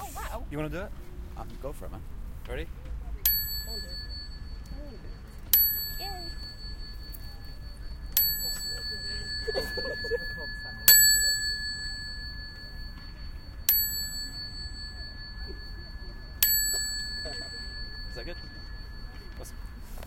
Student dinging their bike bell on request
bicycle, bike, field-recording, outside, bell, ring, ding, cycle